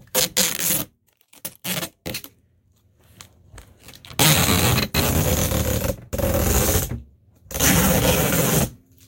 Taking the tape off of the keys of a piano.
sticky, duct, rip, strip, ripping, tear, tearing, tape, adhesive